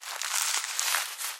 nylon rope string fishing line handling tying tie tense friction (works at low level)
handling, rope, tie, line, nylon, fishing, friction, tense, tying, string